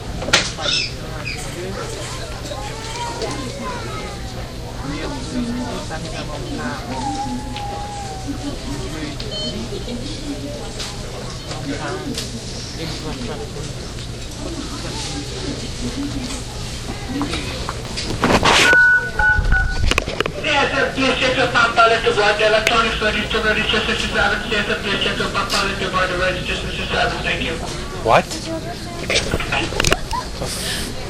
Inside the evil corporation during holiday shopping with the DS-40.
field-recording, shopping, christmas, crowd